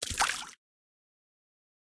water splash 1
A small splash in the water of a pool.
Since the Sony IC Recorder only records in mono, I layered 3 separate splashes sounds(1 left, 1 right, 1 center) to achieve a fake stereo sound. Processed in FL Studio's Edision.
pool, small, sony-ic-recorder, splash, water